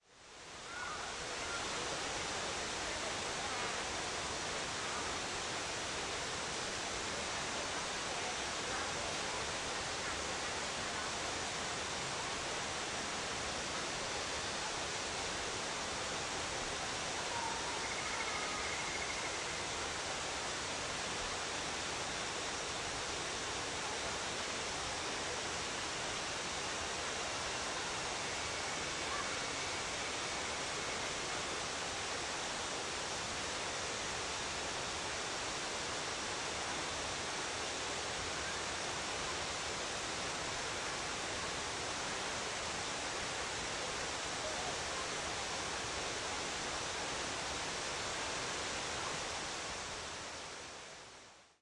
Distant Waterfall 2
Field recording of a waterfall in the distance.
Recorded at Springbrook National Park, Queensland using the Zoom H6 Mid-side module.
stream, nature, forest, ambient, field-recording, waterfall, ambience, distant, river, flow, water, creak